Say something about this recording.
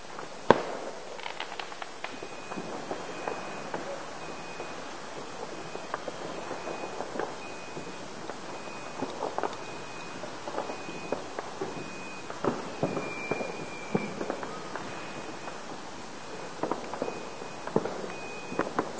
The sound of fireworks night in Manchester UK, 5th of November '08.
Rather low quality because all these samples were recorded with my digital camera. This sound goes on non-stop for a good couple of hours! The night can get slightly foggy with smoke and everywhere smells of fireworks. I love it!
This particular clip has nothing special in it, just fireworks in the background.
ambience, bang, firework, fireworks, guy-fawkes-night